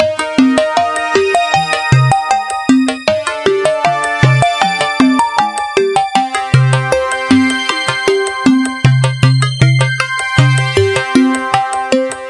20140525 attackloop 78BPM 4 4 Analog 2 Kit mixdown16
This is a loop created with the Waldorf Attack VST Drum Synth. The kit used was Analog 2 Kit and the loop was created using Cubase 7.5. Each loop is in this Mixdown series is a part of a mixdown proposal for the elements which are alsa inclused in the same sample pack (20140525_attackloop_78BPM_4/4_Analog_2_Kit_ConstructionKit). Mastering was dons using iZotome Ozone 5. Everything is at 78 bpm and measure 4/4. Enjoy!
78BPM, dance, electro, electronic, loop, minimal, rhythmic